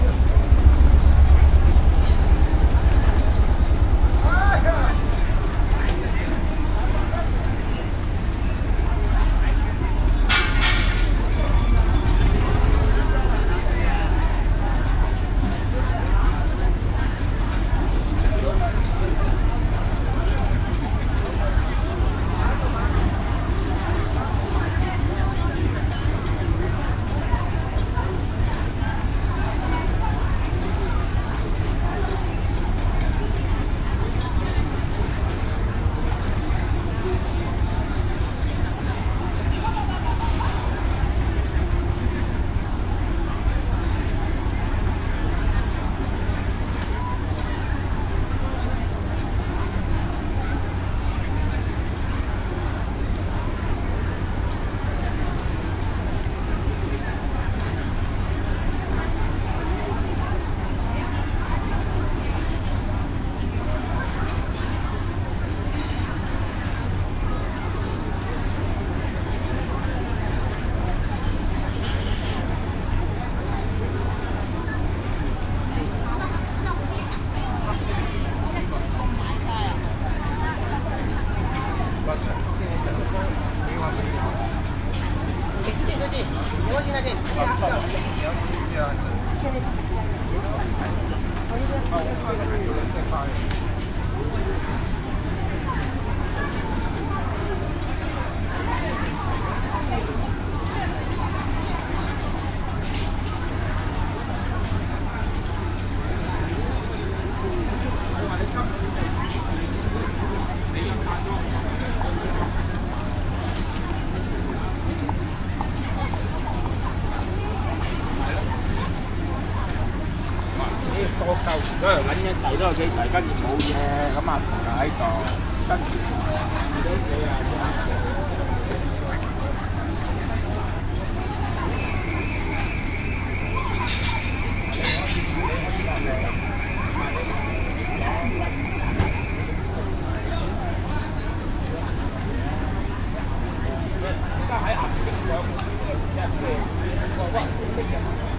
HK Outdoor Restaurant
Mono recording of ambience near a outdoor sea food restaurant in Sai Kung, Hong Kong. It is located very near to the coast, so a little wave sound maybe heard. Recorded on iPod Touch using a capsule mic with iProRecorder Application.